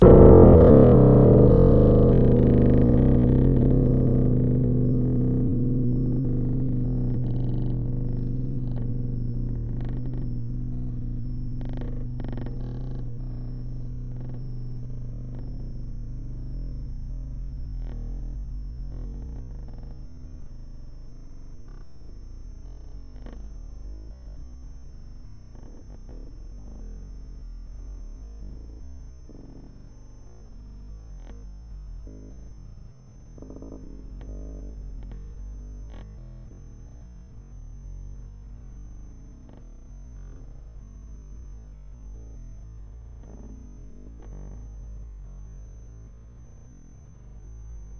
One day I reflected over the sound bubbles in cartoon series magazines and especially the illustration of sound when a bow is pulled and the arrow is triggered. The bubble says 'Twannng'. I made a 4 meters long bow of 3/4 " hard wood and loaded the bow with a thin steel wire. When I trigger this over sized bow, I get a sound which may well translated to 'Twannng'.